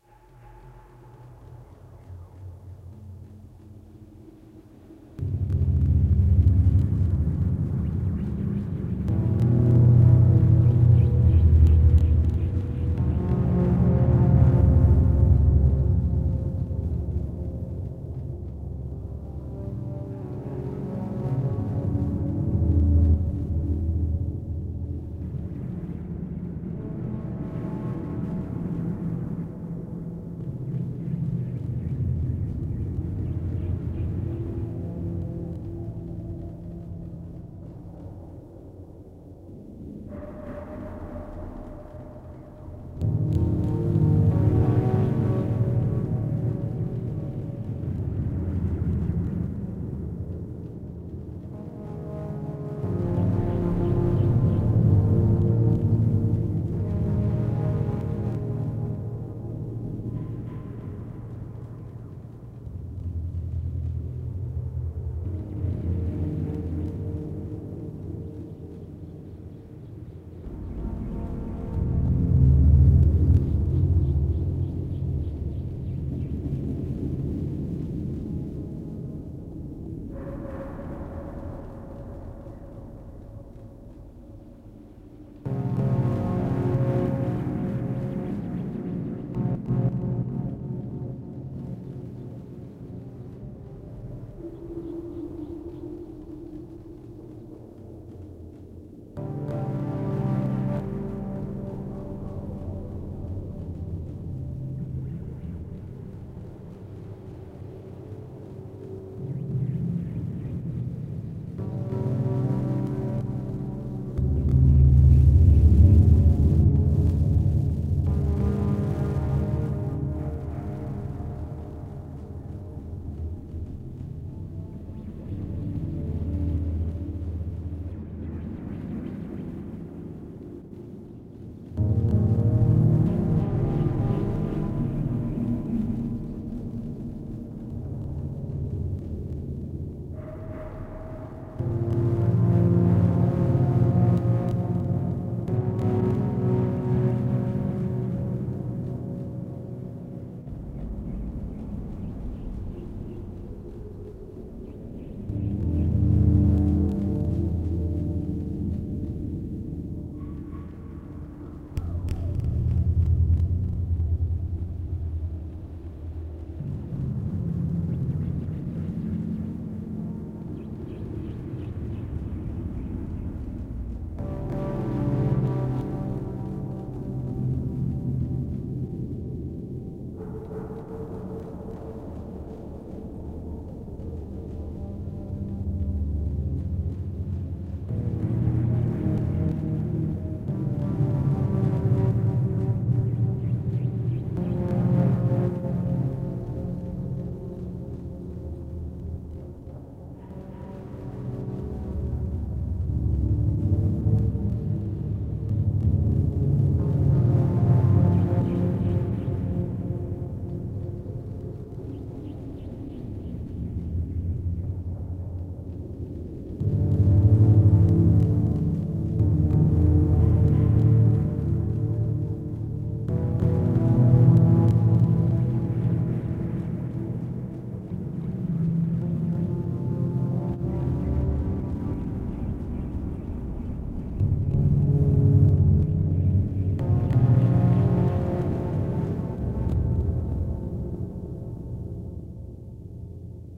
8tr Tape Sounds.
artistic, fantastic, futuristic, magical, notions, philosophical, pluralistic, scientific, tape